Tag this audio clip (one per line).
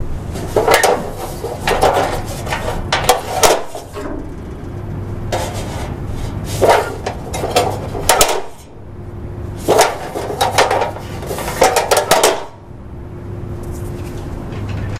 close
mailbox
open
small
tin